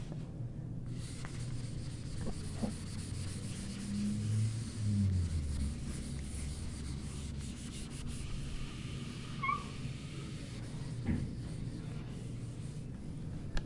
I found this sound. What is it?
Eraser on a white board
eraser, office